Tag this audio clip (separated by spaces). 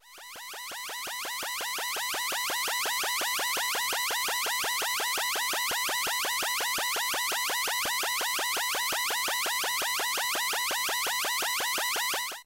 8bit retro sample